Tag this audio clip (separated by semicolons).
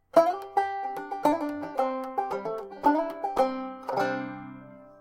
Banjo-music; Banjo; music